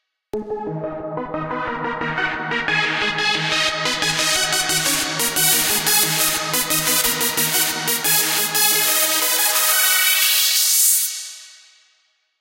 PollyUniLP2HP
Taken from a track I produced.
FOLLOW FOR FUTURE TRACKS!
All samples taken from the song: I am with you By: DVIZION
179BPM, Bass, Beat, DnB, Dream, Drum, DrumAndBass, DrumNBass, Drums, dvizion, Fast, Heavy, Lead, Loop, Melodic, Pad, Rythem, Synth, Vocal, Vocals